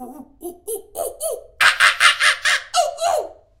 Monkey sound made by human
ape, fake, monkey